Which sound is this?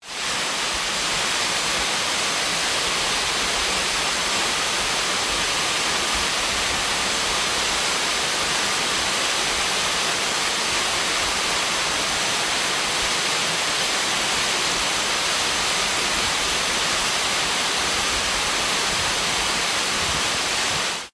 Waterfall loud
creek, river, water, waterfall